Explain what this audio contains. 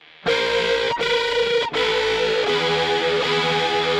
Rhythmic loop with my guitar. Logic
120-bpm, guitar, rhythm, loop